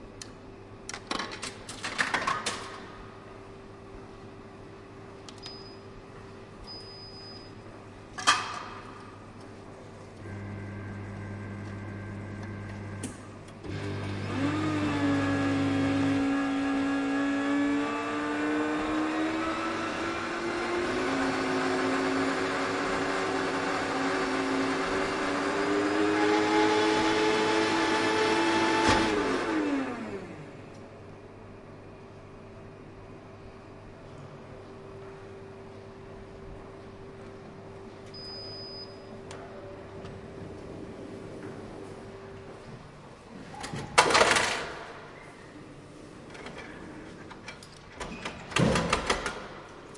I recorded this coffee machine at the UCM university in Trnava (Slovakia). The station is quiet. You can hear sounds of money, when putting it to the machine, 50HZ sound when the machine pour the coffee, the beep at the end and money when taking back the money. Recorded with Zoom H1.
automat, bufet, coffee, drink, machine, shop, snack-bar, station, university